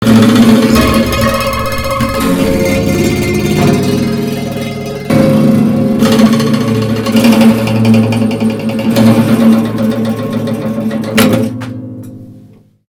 This sound is of an extended technique for classical guitar called
Rythmical Vibration R.V